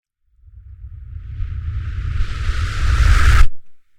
White noise Fade In
A fading in white noise sound, for use as transition or a crescendo.
wilds, wake, shock, climax, transition, shotcut, crescendo, up, buildup, wakeup, fadein, white, outerwilds, noise, whitenoise, outer, fade